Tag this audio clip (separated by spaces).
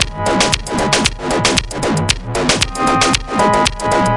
115 C minor